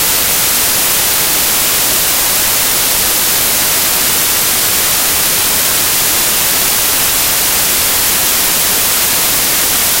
White Noise 0dBFS 10 seconds (Gaussian)
Noise, Radio, White